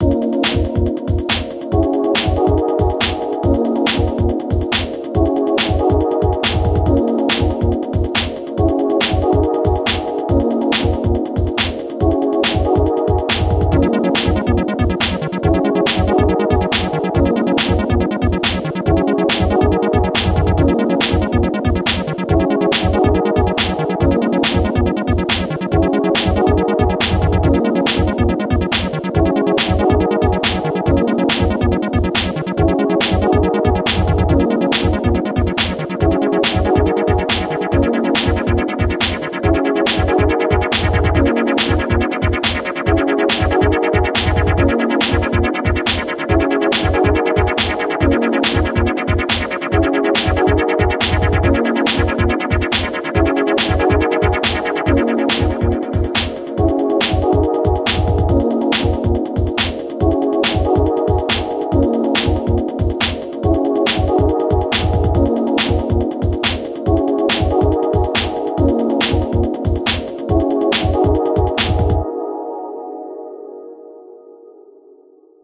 fun dancetrack
Simple little dance track I created with reason. The water sound in the beginning I got from another user on this site. All the other sounds are from reason software.
dance, chill, simple, fun